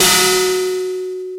Sound effect made with VOPM. Suggested use - Metal clang.